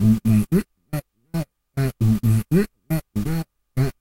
Beatbox 01 Loop 04 VocalBass@120bpm
Beatboxing recorded with a cheap webmic in Ableton Live and edited with Audacity.
The webmic was so noisy and was picking up he sounds from the laptop fan that I decided to use a noise gate.
This is a throat bass loop. The gate adds a bit of a strrange envelope to the sounds, and some noise still comes through. Also, I am not sure this loop has the tightest tempo.
Defenitely not the best sample in the pack, but still decided to upload it, in case it is of use for someone.
bass, loop, beatbox